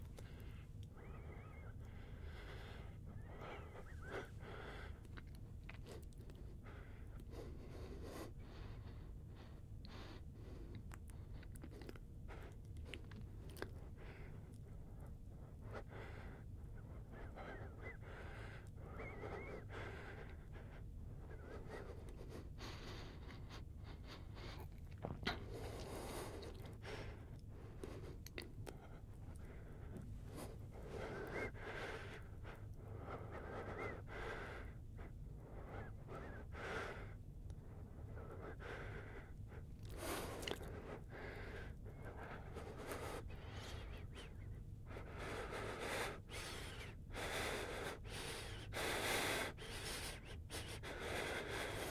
Breathing and Weezing recorded for a scene with a man being threatened by a gun.